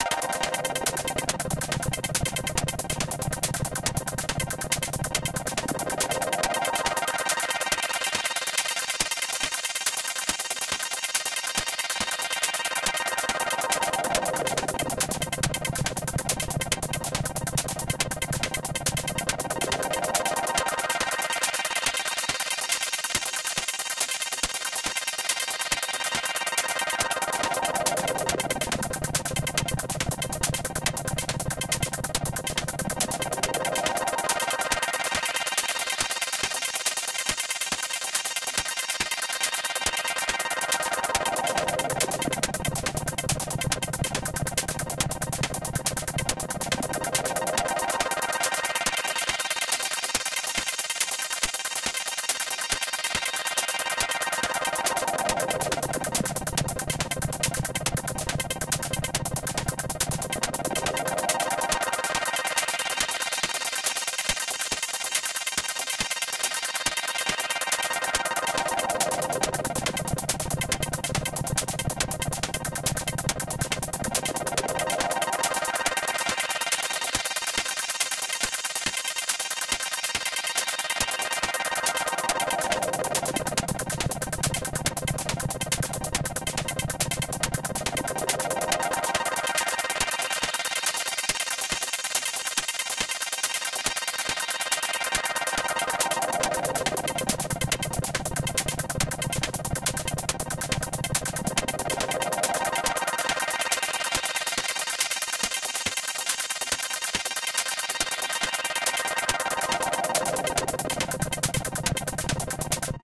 insect city
Good background sound for insect video. I made it in fruity loops
background, creeping, insects, song